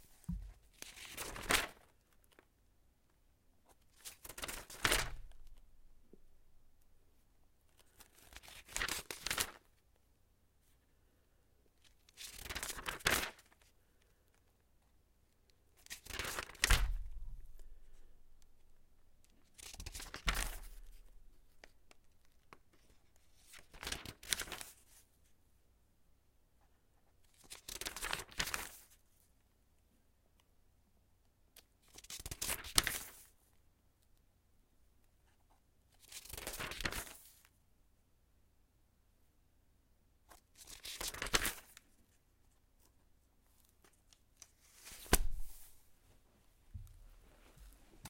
Pages Turning!
Pages of a book being flipped slowly. Recorded using an AKG Perception 120 in my home studio.